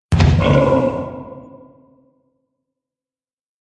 This pack of 'Monster' noises, are just a few recordings of me, which have lowered the pitch by about an octave (a B5 I think it was), and then have processed it with a few effects to give it slightly nicer sound.
beast, beasts, creature, creatures, creepy, growl, growls, horror, monster, noise, noises, processed, scary